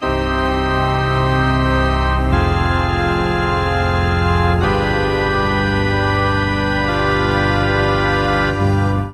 Creepy Organ Loop3
Just a loop of scary organ music recorded and then edited.